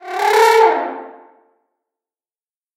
Rhinos do not actually trumpet, but in Ionesco's play Rhinoceros they do. This is the sound of a fictional trumpeting rhinoceros created using a French horn and some editing. This was a shock trumpet that happens very suddenly. Thanks to Anna Ramon for playing the french horn.